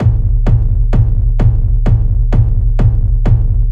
Propellerheads Reason
rv7000
3 or 4 channels, one default kick, others with reverb or other fx.